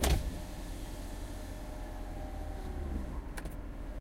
Honda CRV, power window being rolled down from interior. Recorded with a Zoom H2n.